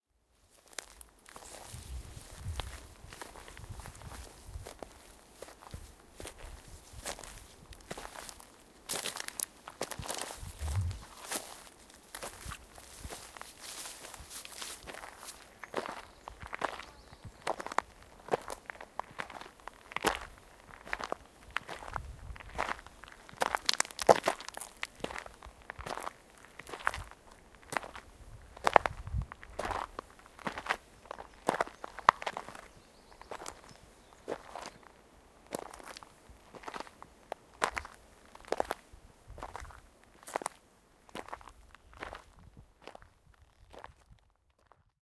Forest Walk
A walk in the forest. Footsteps are audible. Greece, 2019.